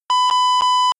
Error beep like one from PC speaker generated with Audacity Nyquist script.
Please make sure to mention me in credits.